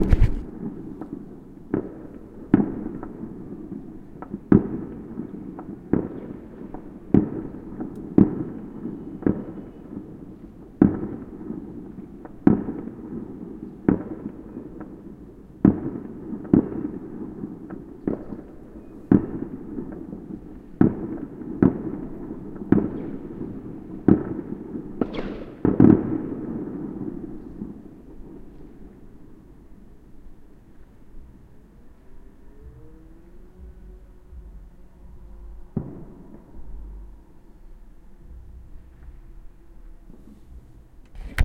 Fireworks in the distance.